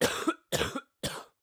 This is one of many coughs I produced while having a bout of flu.